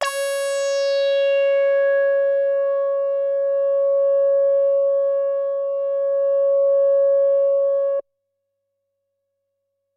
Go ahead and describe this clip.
DDRM preset #14 - C#5 (73) - vel 127
Single note sampled from a Deckard's Dream DIY analogue synthesizer that I built myself. Deckard's Dream (DDRM) is an 8-voice analogue synthesizer designed by Black Corporation and inspired in the classic Yamaha CS-80. The DDRM (and CS-80) is all about live performance and expressiveness via aftertouch and modulations. Therefore, sampling the notes like I did here does not make much sense and by no means makes justice to the real thing. Nevertheless, I thought it could still be useful and would be nice to share.
Synthesizer: Deckard's Dream (DDRM)
Factory preset #: 14
Note: C#5
Midi note: 73
Midi velocity: 127